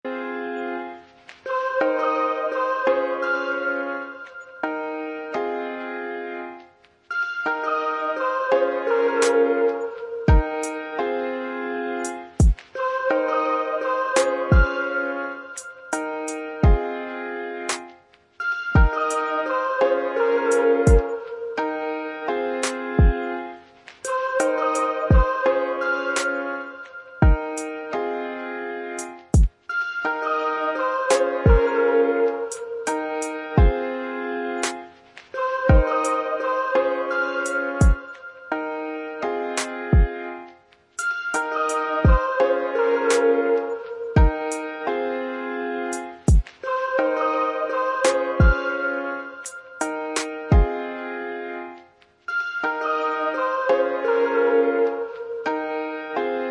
Really Lo-Fi melody loop made with Garageband.
It’s T0X1C!
loop, Melody, Relaxed